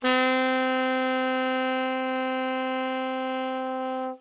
Alto Sax C4
The C4 note played on an alto sax
alto-sax, jazz, instrument, sampled-instruments, woodwind, sax, music, saxophone